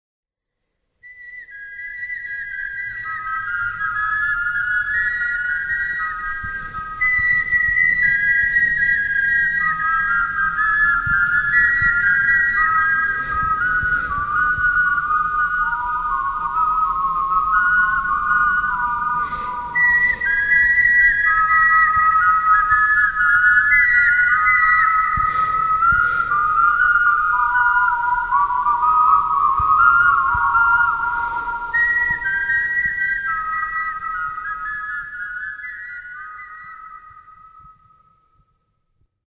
Me whistling the folk song oranges and lemons, then added some reverb and delay. (used Logic)